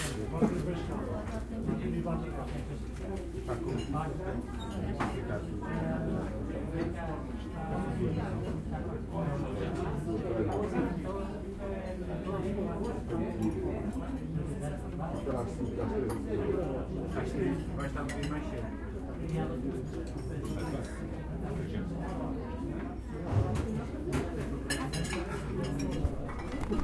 Sound of audience in Lisbon cafe.